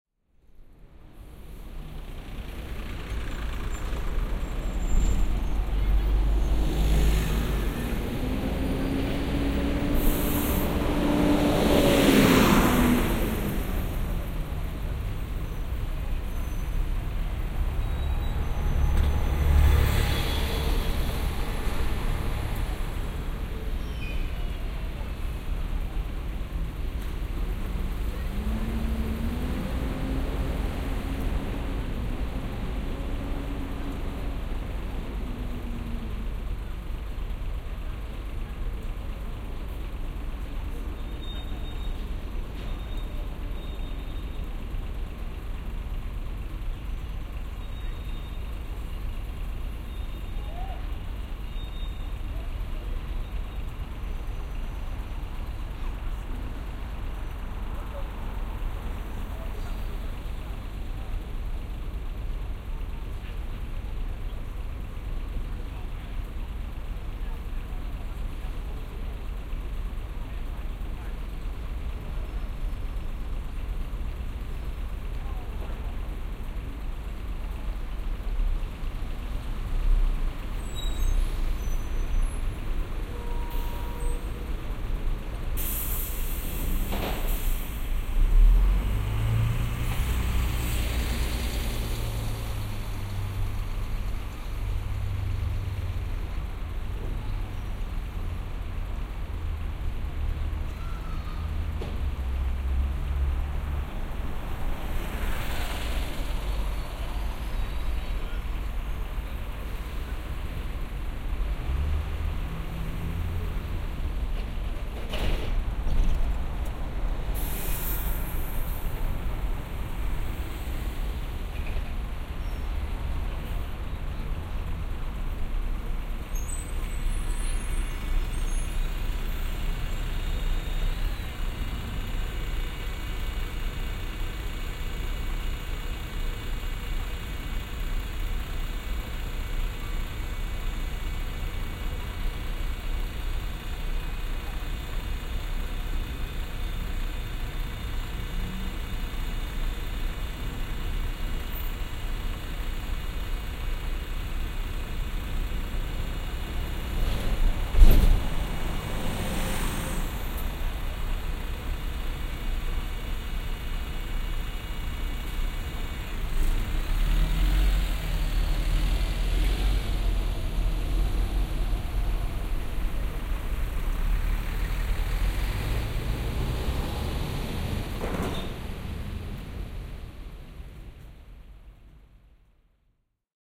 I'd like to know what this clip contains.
A binaural recording of the busy Charles Street, which has a large number of bus stops. This makes this particular spot very noisy. To get the best effect please listen to this with headphones.
bus, field-recording, soundmap